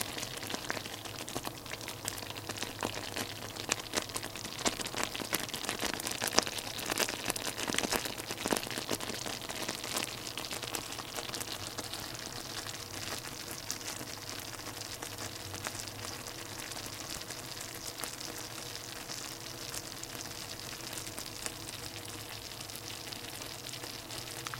Cooking smashed beans in a pan(I think? It's been a while since I recorded this). Recorded with a Sony IC Recorder and processed in FL Studio's Edison sound editor.